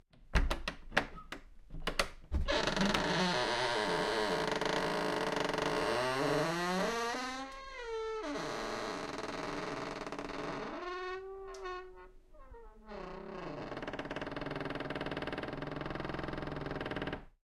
Squeaky Door - 114
Another squeaky door in our hands...
Door, Squeaking